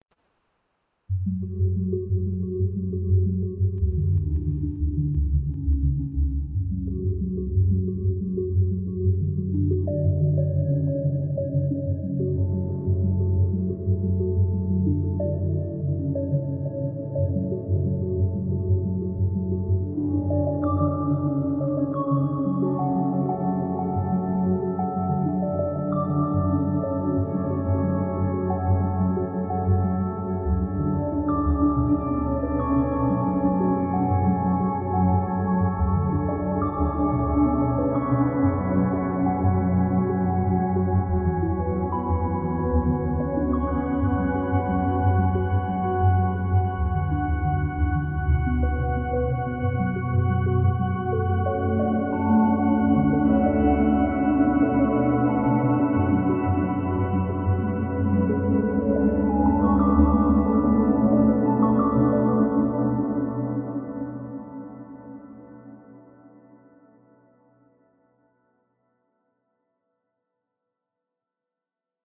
out of orbit
Free soundtrack for using with Sci-Fi/mystery type of movies.
science-fiction, soundscape, ambience, space, sci-fi, atmosphere, mystery, ambient, dark, mystic